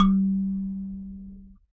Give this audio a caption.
SanzAnais 55 G2 lg
a sanza (or kalimba) multisampled